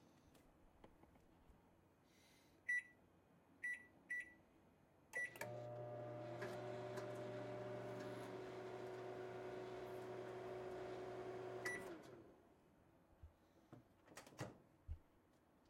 turning on microwave
Pushing the buttons on the microwave
beep, turn-on